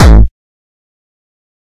Distorted kick created with F.L. Studio. Blood Overdrive, Parametric EQ, Stereo enhancer, and EQUO effects were used.
techno, beat, bass, progression, kick, synth, distorted, kickdrum, drum, melody, hardcore, distortion, trance, hard